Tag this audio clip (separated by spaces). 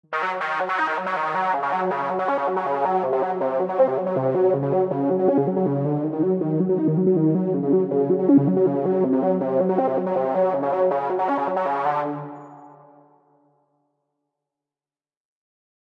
techno trance